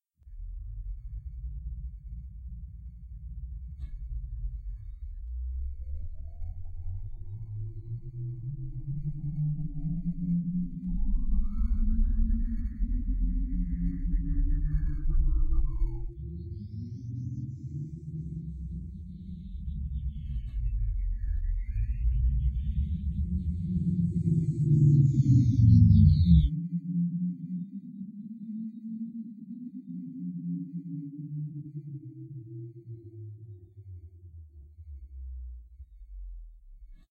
spaceport operator number one five zero
layered sounds of spacecraft coming and going, futuristic space port or something like that.
Enjoy, use anywhere. Send me a comment if you want! I'd like some feedback.
landing, taking-off, space, spacecraft, panning, soundscape, audacity